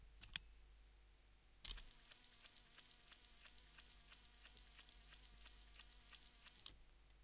son de machine à coudre